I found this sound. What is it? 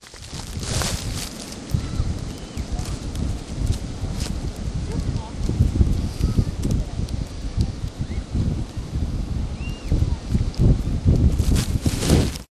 newjersey OC bagonbeach11
10th Street beach in Ocean City recorded with DS-40 and edited and Wavoaur. From inside a plastic bag.
ambiance, beach, field-recording, ocean-city